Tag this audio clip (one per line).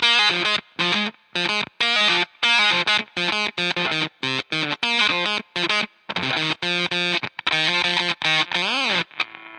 100
fuzz
fm
guitar